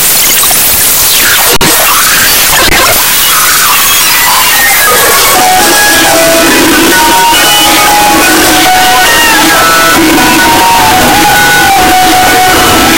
A field-recording done of a performer in the NYC subway is reversed and sent through a simple distortion patch. Very, very nasty -- and LOUD.
audio-art, distortion, noise, maxmsp, reverse, itp-2007